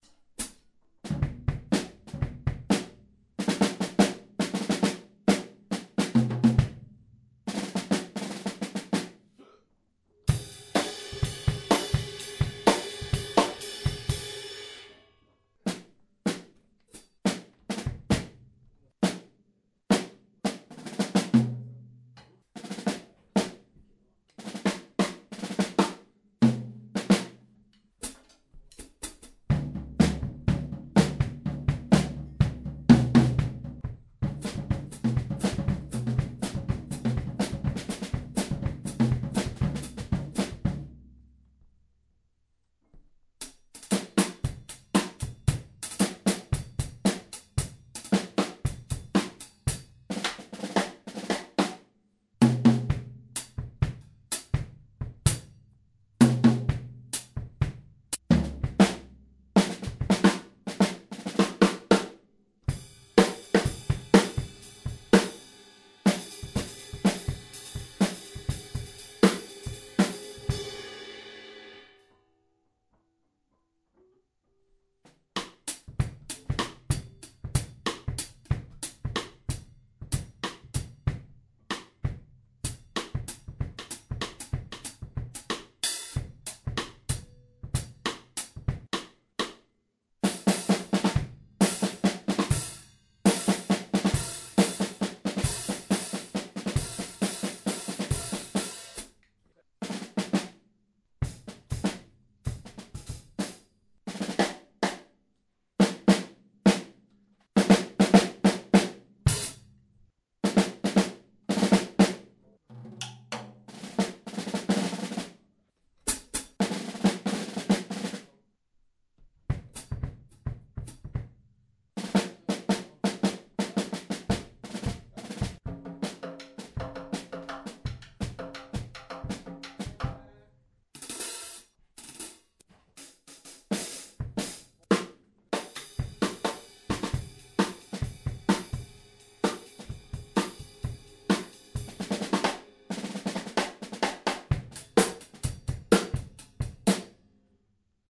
supraphonic dumpster recording 2
Two minutes of Ludwig Supraphonic - played by me testing the sound, tuning etc before a recording session.
Some beats are also available in edited / cropped form here.